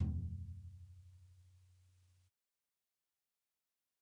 Dirty Tony's Tom 14'' 005
This is the Dirty Tony's Tom 14''. He recorded it at Johnny's studio, the only studio with a hole in the wall! It has been recorded with four mics, and this is the mix of all!
pack, punk, 14x10, 14, real, heavy, raw, drumset, metal, tom, drum, realistic